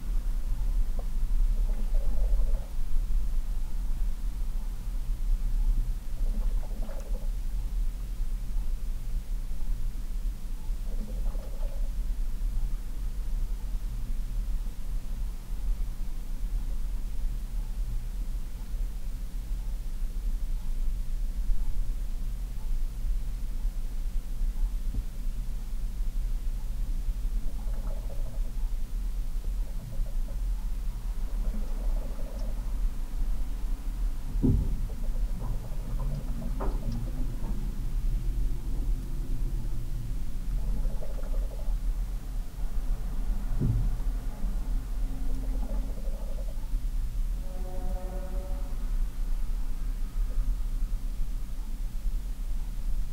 The hum and weird liquid sounds from inside a refrigerator.